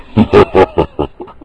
Demonic Laugh
Sounds like an evil demon laughing.
made by lowering the pitch of my own laugh
Hell, Laugh, Voice, Deep, evil, Demonic, Monster, Demon, Scary